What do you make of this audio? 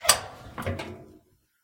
Appliance-Washing Machine-Door-Open-02
The sound of a washing machine's door being opened.